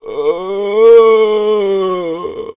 A low pitched guttural voice sound to be used in horror games, and of course zombie shooters. Useful for a making the army of the undead really scary.
sfx, Monster, Evil, videogames, indiegamedev, gamedeveloping, Ghoul, Voices, Speak, gaming, indiedev, games, Growl, Vocal, Zombie, Talk, horror, gamedev, Lich, Voice, videogame, game, arcade, Undead